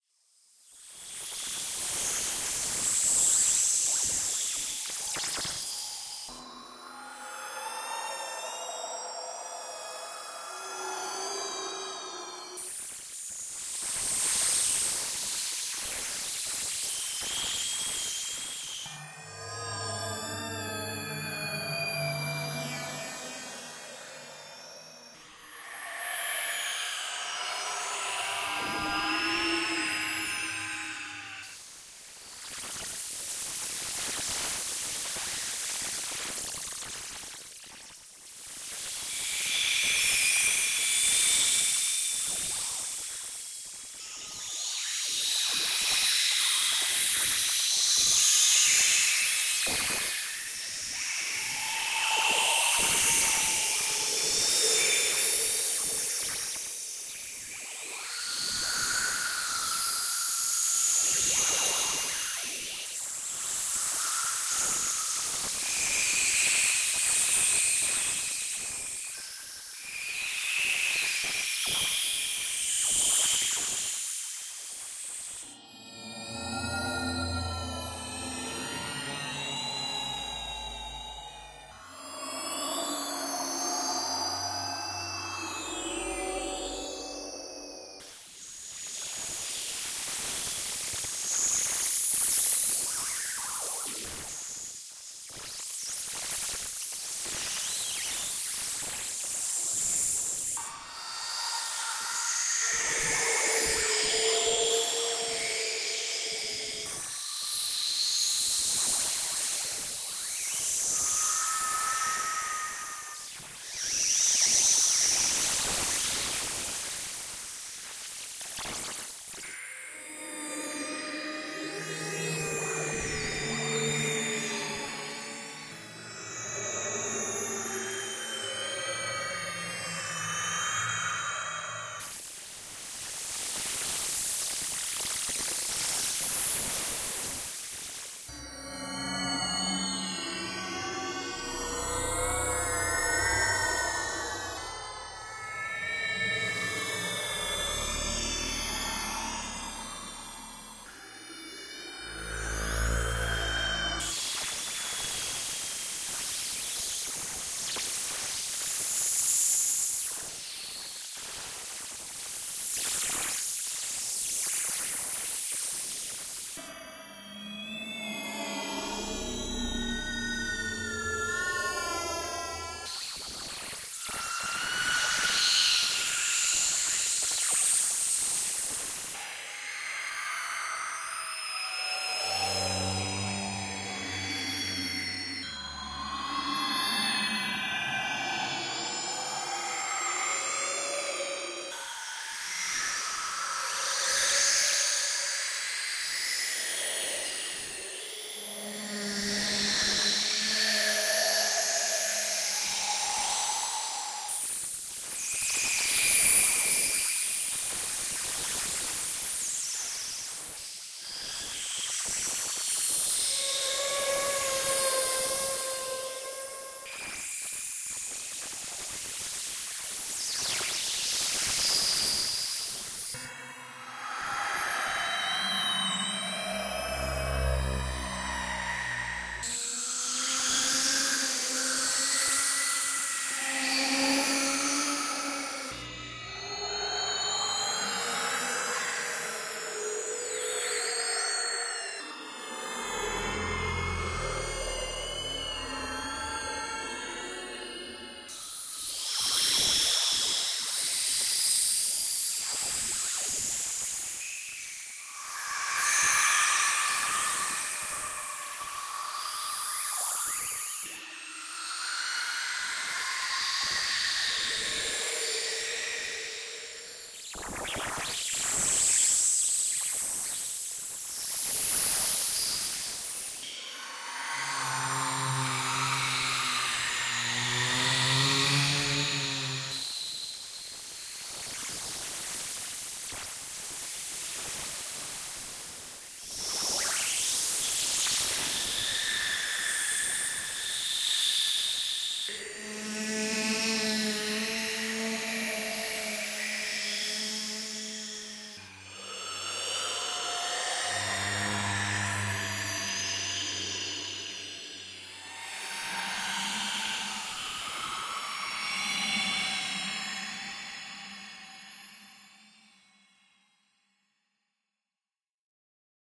Space Drone 19
This sample is part of the "Space Drone 2" sample pack. 5 minutes of pure ambient space drone. Mainly higher frequency screams & space noises.